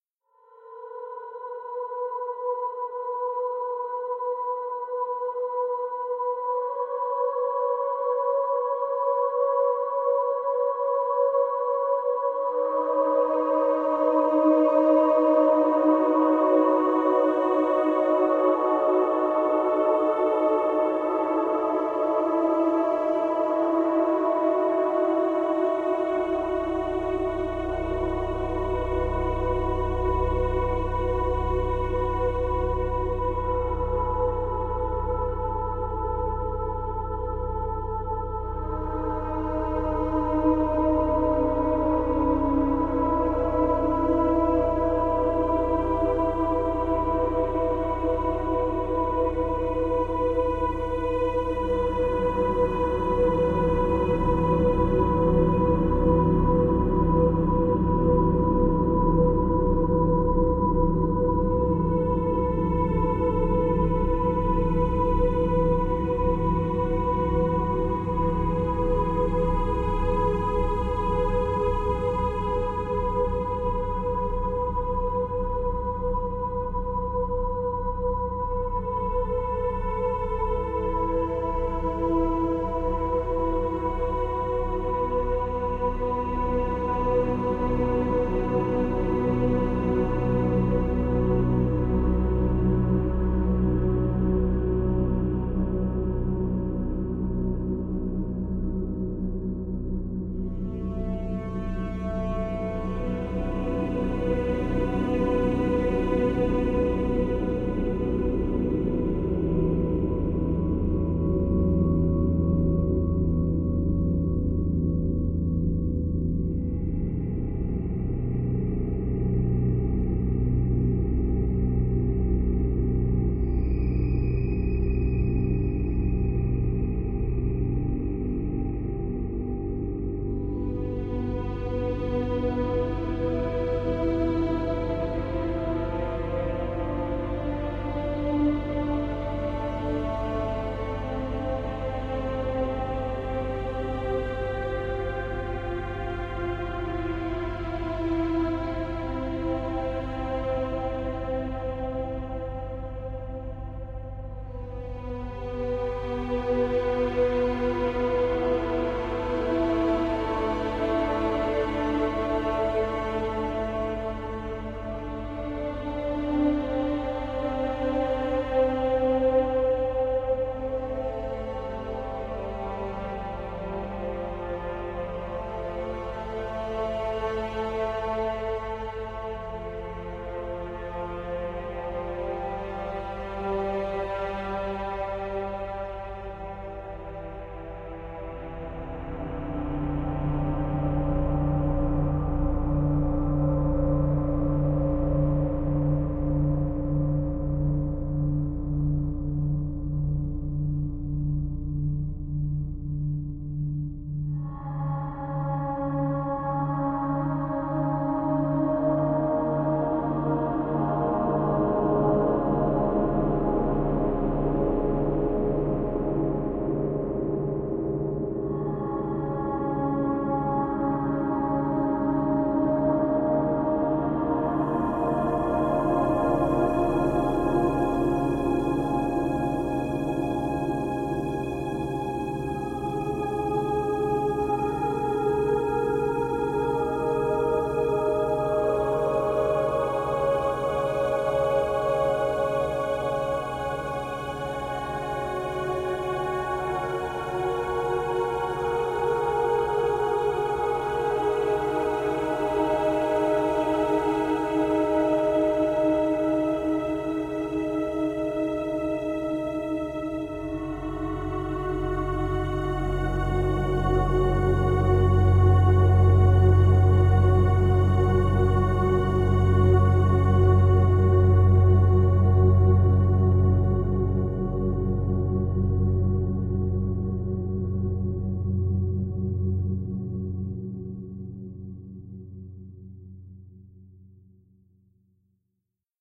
symphony background
A long simple orchestral ambient soundtrack consisting of three distinct parts changing from kind\hopeful to sinister\anxious atmosphere, and then back. You can find it useful as a simplistic cinematic music or as a background soundtrack for a story.
atmosphere, drama, suspense, orchestral, sinister, simple, symphony, film, cinematic, strings, dramatic, soundtrack, movie, background